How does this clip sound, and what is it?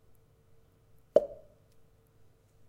Cork pop
An old bottle being oppened as the cork makes a popping noise.